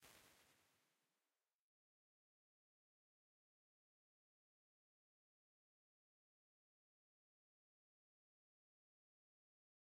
QV Hall dec50 diff5
Quadraverb IRs, captured from a hardware reverb from 1989.